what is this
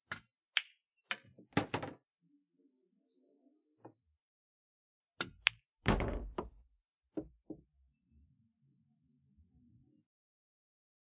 Pool table hitting ball in the pool table hole in different ways.